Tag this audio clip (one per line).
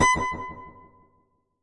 arcade
games
video
indiedb
IndieDev
stars